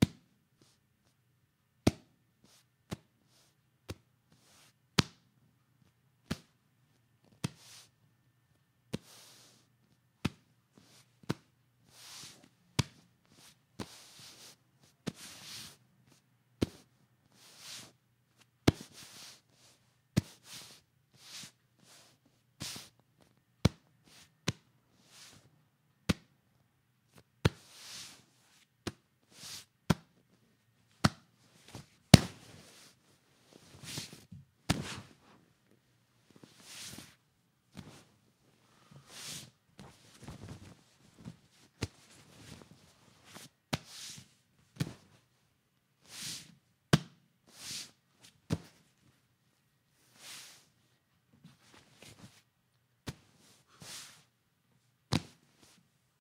Leather Couch Foley
Recording of a pillow wrapped in a leather jacket
Couch, Foley, Leather, Move, Sit